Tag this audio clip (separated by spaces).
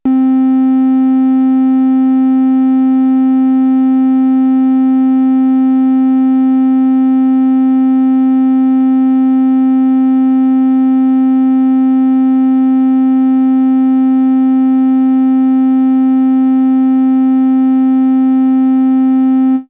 instruments sample wave mopho dave basic smith